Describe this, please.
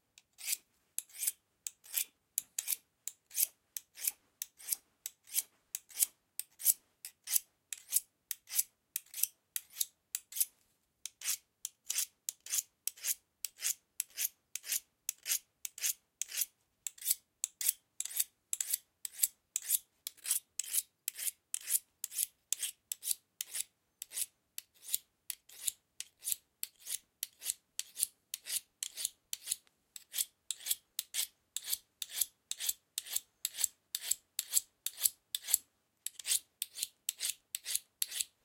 Knife Sharpening
Recoreded with Zoom H6 XY Mic. Edited in Pro Tools.
A knife is being sharpened.
knife, sharpen, sharpening, blade